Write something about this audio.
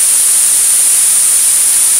Analogue white noise BP filtered, center around 9.5kHz
Doepfer A-118 White Noise through an A-108 VCF8 using the band-pass out.
Audio level: 4.5
Emphasis/Resonance: 9
Frequency: around 9.5kHz
Recorded using a RME Babyface and Cubase 6.5.
I tried to cut seemless loops.
It's always nice to hear what projects you use these sounds for.
analog, analogue, bandpass, BP, electronic, Eurorack, filter, filtered, generator, loop, modular, noise, recording, short, synth, synthesizer, wave, waveform, white-noise